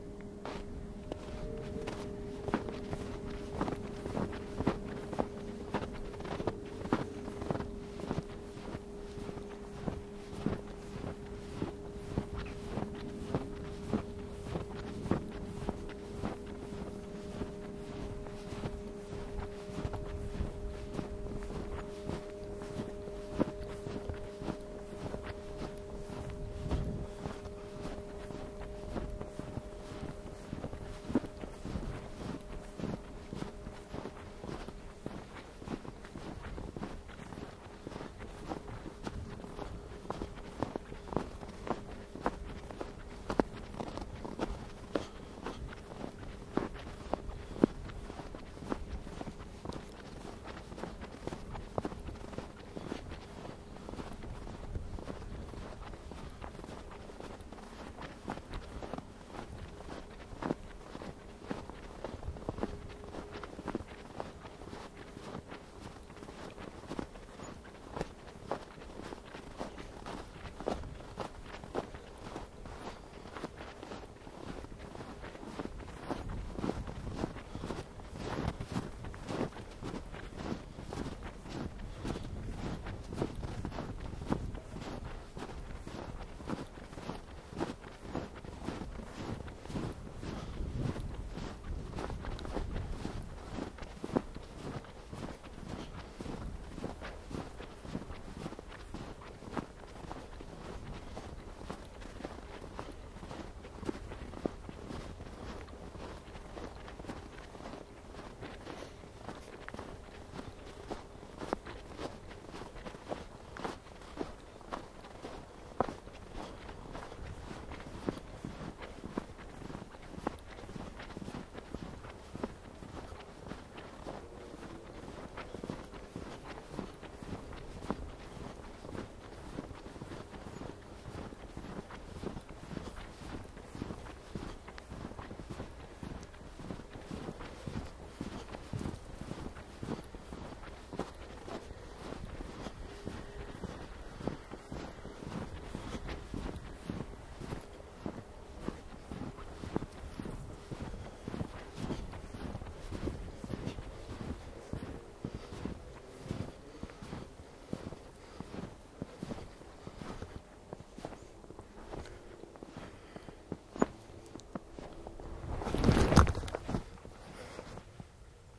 Field recording of me walking through the snow. There's some background noise/sounds here and there.